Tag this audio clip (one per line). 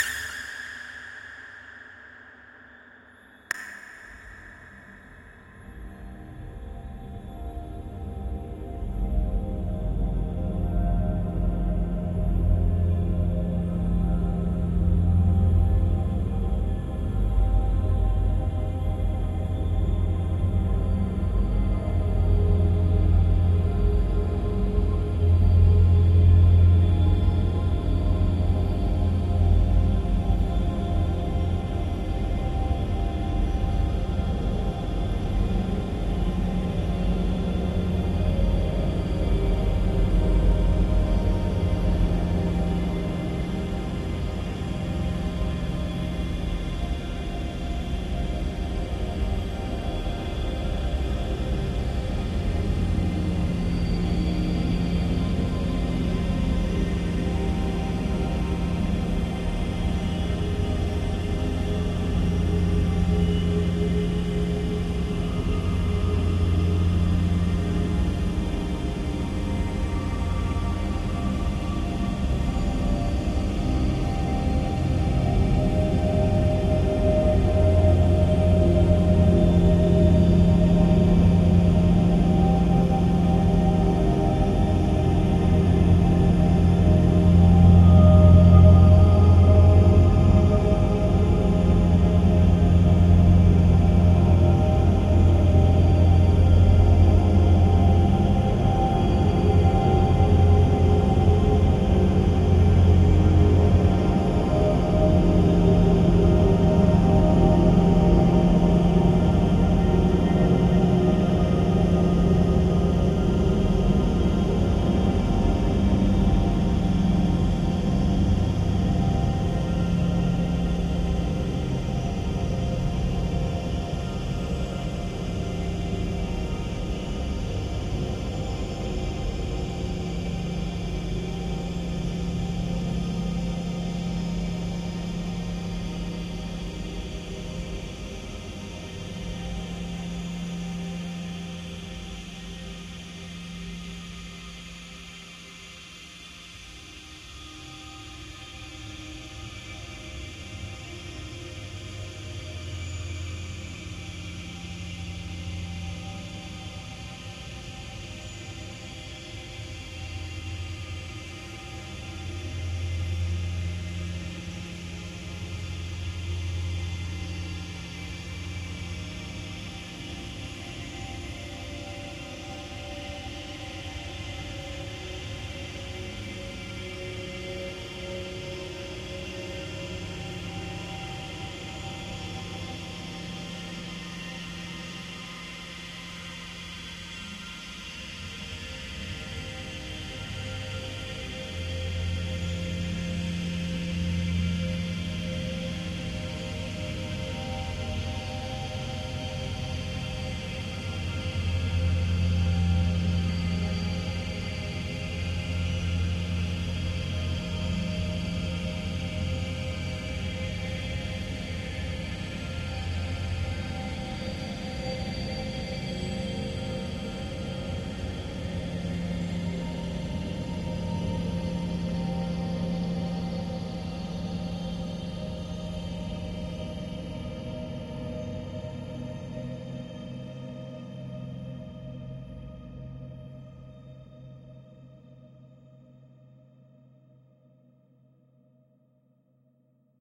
ambient
artificial
divine
dreamy
drone
evolving
multisample
pad
smooth
soundscape